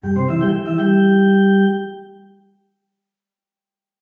The classic old time "play ball" tune, synthesized in Noteworthy Composer.